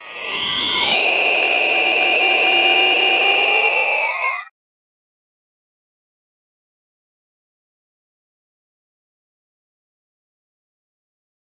2. of 4 Monster Screams (Dry and with Reverb)
Monster Scream 2 DRY
Creepy, dry, Horror, Mystery, Fantasy, Sound, Scream, Atmosphere, Roar, Sounddesign, Huge, Reverb, Effect, Eerie, pitch, Sound-Design, Monster, Game, Sci-Fi, Movie, Scary, Strange, Film, Creature, Spooky, High, Growl